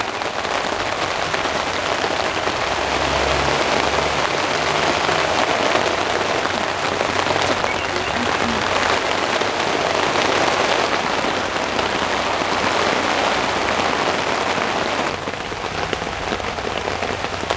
This is some rain falling on my tent when I was staying at Ponsonby Backpackers in Aukland, New Zealand. It was recorded with my little video camera. There was some in camera editing done which may or may not be obvious.